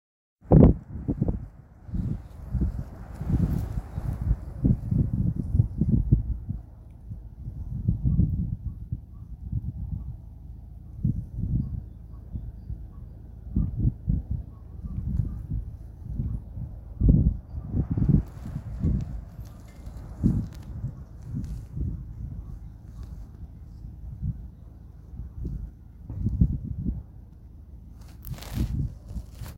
Wind blowing through vineyard wires. Whine ...geddit?
energy, windy, blow, wire, howling, Wind, vineyard, outdoors